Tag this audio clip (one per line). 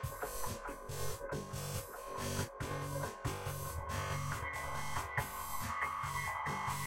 ambient
background
d
dark
dee-m
drastic
ey
glitch
harsh
idm
m
noise
pressy
processed
soundscape
virtual